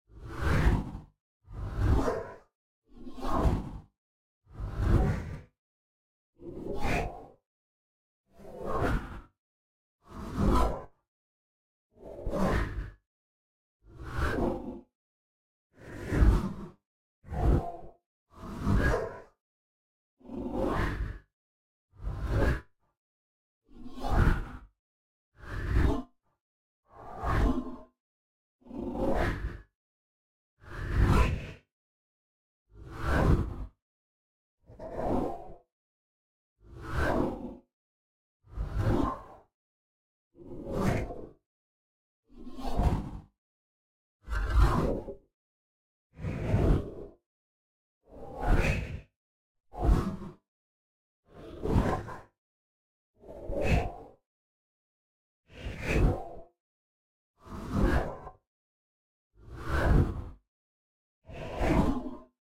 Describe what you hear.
01 Whoosh,Metal,Alien
This is a collection of whooshes that I created using Melted Sounds' Whoosh Reaktor patch, loaded with a collection of samples I recorded of a piece of sheet metal being rubbed with my hand. There are 35 different whooshes of fairly similar length in 01, and 29 varied whooshes in 02 which were hand-performed within the patch.
ps. If you like these, there is a second file with a wider variety of whooshes in a similar style here:
Swish, Space-ship, by, Whoosh